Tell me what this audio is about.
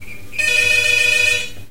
old cheap phone 901
One of those old style cheap electric home-phones ringing (brand was "Cresta"). It's a really old sample, maybe someday I will find back the old recording this came from, because for now I don't have it in raw format, only this MSADPCM version.
ring, cresta